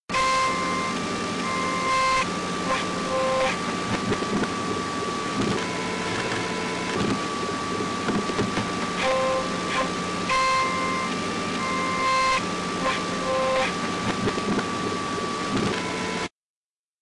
pre-recorded organ sounds run through a SABA television at high volume; recorded with peak and processed in Ableton Live
buzz, distorted, distortion, flutter, noise, organ, peak, scream